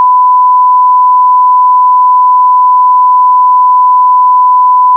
Ah, the famous censor bleep. Generated in Audacity.(1000 Hz tone) Perfect for censoring swears. Could also be used for a test pattern.
bad-word, beep, bleep, censor, censored